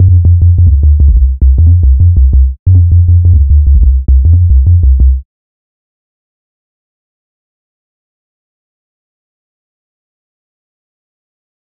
12 ca bassline
These are a small 20 pack of 175 bpm 808 sub basslines some are low fast but enough mid to pull through in your mix just cut your low end off your breaks or dnb drums.
drums, jungle, loop, bass, dance, techno, 808, kick, beat, drum, sub